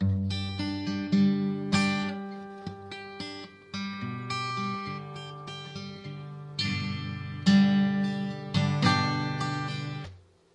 test guitar2
Testing the DS-40 in various USB class settings to determine if the unit can work as a cheap USB interface.
acoustic, microphone, test